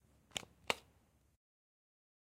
Movimiento Brusco De Arma 3 s
guns, movement, Gun